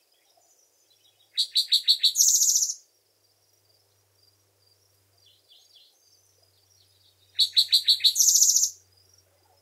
Wild sound of a chickadee with other birds and crickets in the background.
crickets, bird, field-recording, birds